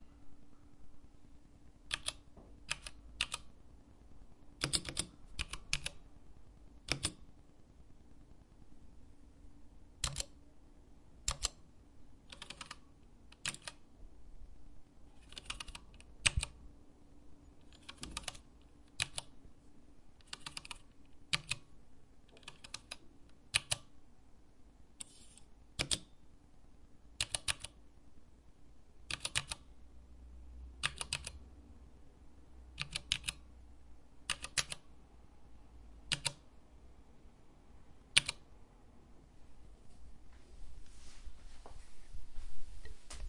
Mechanical keyboard clicking. Different keys (3)
Mechanical keyboard clicking. Different keys
Cherry mx clear mechanical switches
The Cherry MX Clear switch is a medium stiff, tactile, non-clicky mechanical keyboard switch in the Cherry MX family.
The slider is not actually clear but colourless (in effect, translucent white). The word Clear is Cherry's own designation to distinguish it from the older Cherry MX White which is a clicky switch. Older types of the "white" also have translucent white sliders and are therefore visually indistinguishable from clears.
The Cherry MX Tactile Grey switch is used for space bars in keyboards with Cherry MX Clear switches. It has a similarly-shaped stem but a stiffer spring.
MX Clear is reported to have first appeared in 1989; however, it was included in a March 1988 numbering system datasheet for MX switches so it is assumed to have been in production in 1988 or earlier.